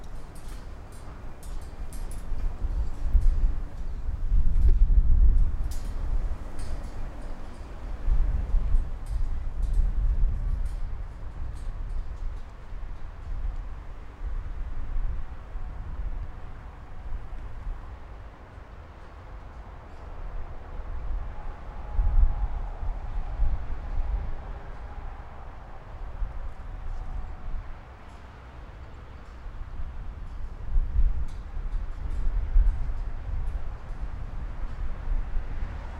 outside, near motorway, wind, flagpoles
setnoise, outside, motorway, wind, flagpoles